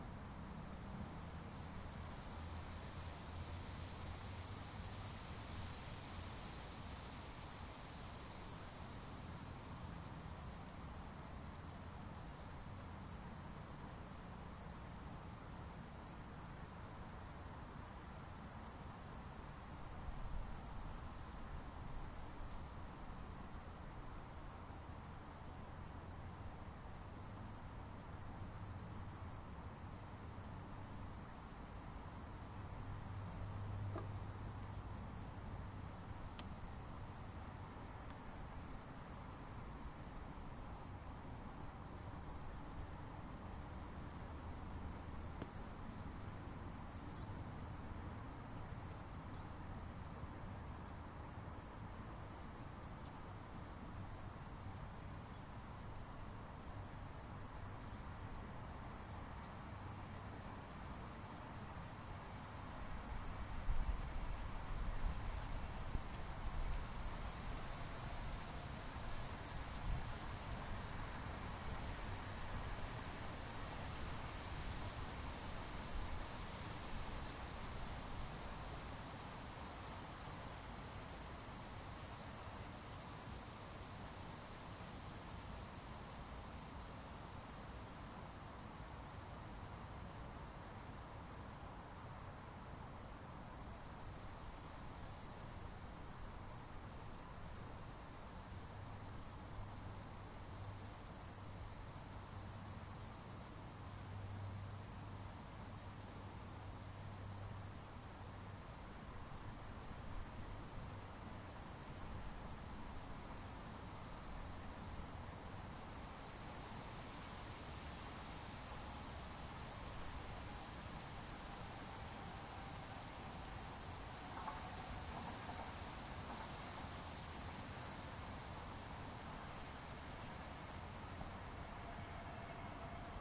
Forest Day roadhumm train
A simple field recording of an autumn day in Tikkurila, Vantaa, Finland.